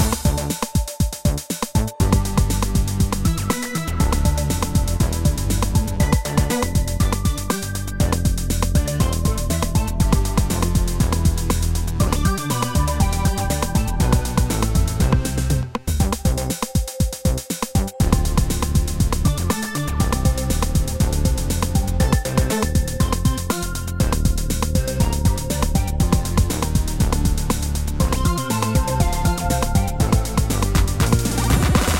short loops 31 01 2015 c 5
game, short, music, tune